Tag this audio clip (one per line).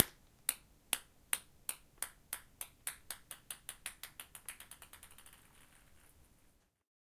Ball
Pong
Ping